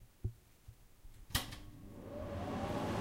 KitchenEquipment CookerFan Stereo 16bit
16, bit